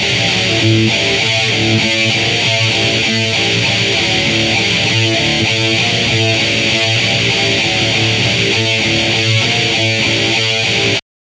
REV LOOPS METAL GUITAR 10
rythum guitar loops heave groove loops
heavy, metal, guitar, thrash, rock, groove